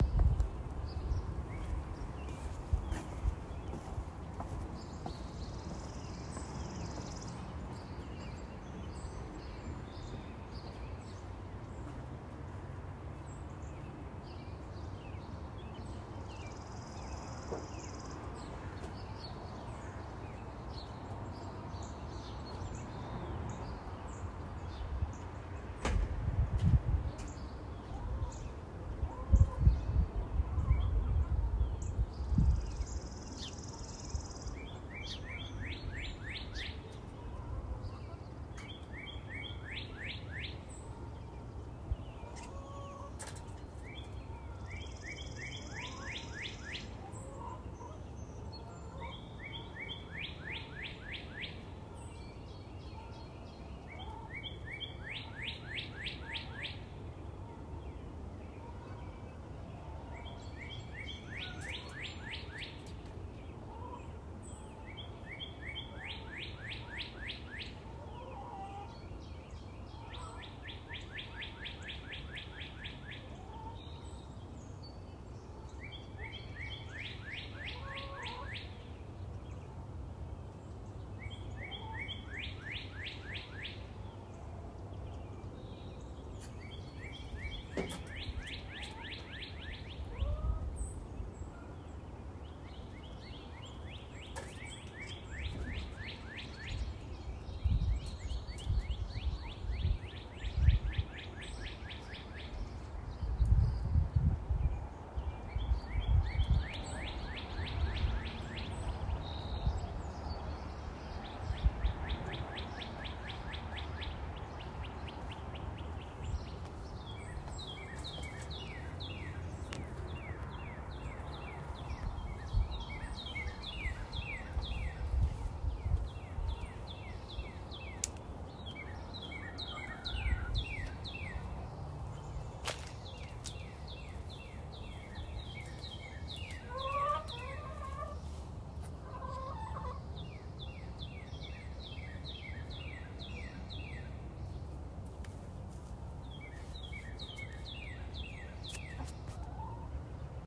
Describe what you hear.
Morning Spring Ambience - Early April
A recording of my backyard early April morning. Various birds, light traffic (far away), light breeze, and some occasional chickens. Can faintly hear me walking and doing some light chores around the yard (my apologies for that).
Spring, Rustic, traffic, Chickens, New, light, Ambience, backyard, Morning, Suburban, Birds, Atmosphere, April, Jersey, Early, neighborhood, Robins